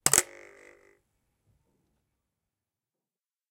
Recorded knifes blades sound.